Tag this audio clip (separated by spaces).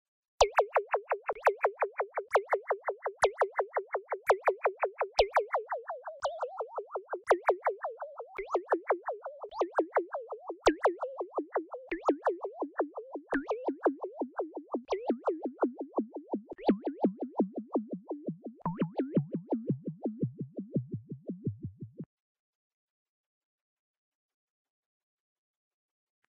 aliens computer laser ship space weird